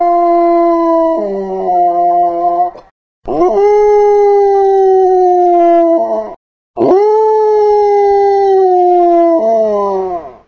basset hound-howl
Basset hound howling
dog, howl, hound, basset